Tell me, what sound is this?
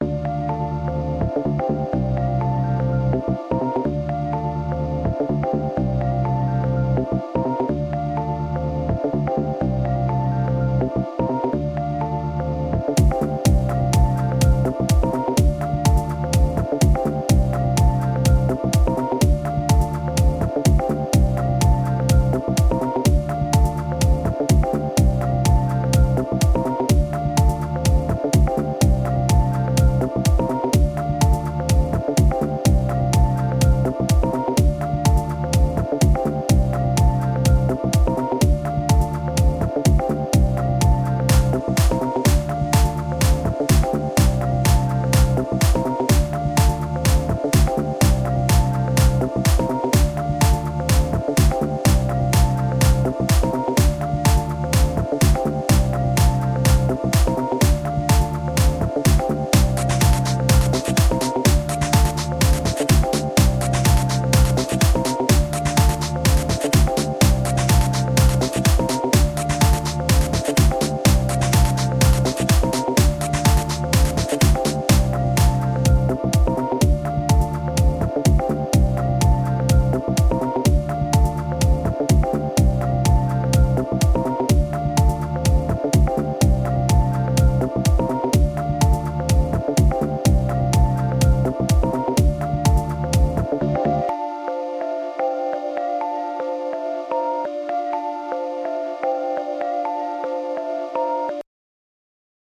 Jingles and Beats music
beats, sound